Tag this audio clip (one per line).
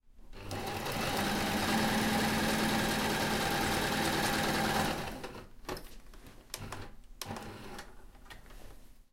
far
sewing-machine